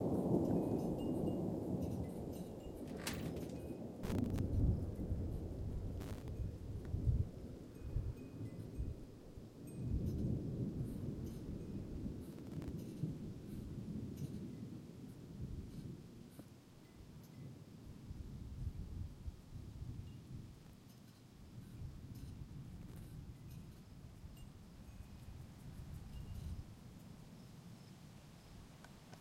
recorded outside my house with Tascom DR-07mkII
panhandle, texas, thunder